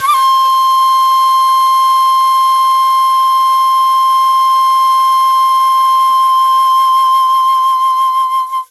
Flute Dizi C all notes + pitched semitones